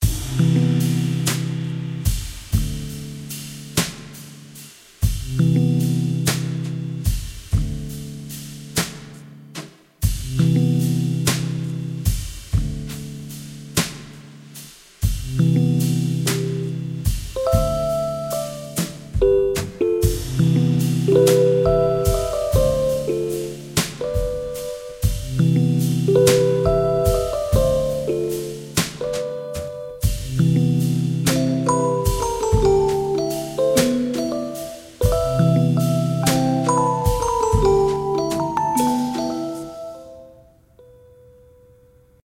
some music i made for an ad, they didn't used